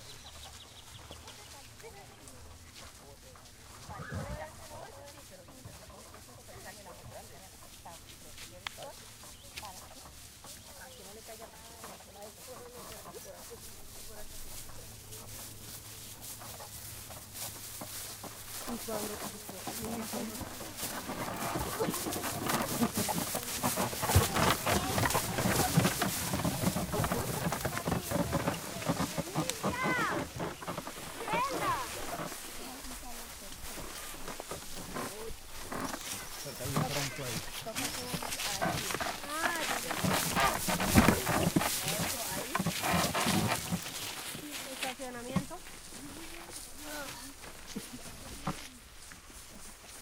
wood cart pushed through tall grass by group of people speaking spanish rattle squeak a little